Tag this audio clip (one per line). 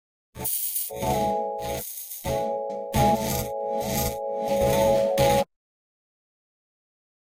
electronica
sliced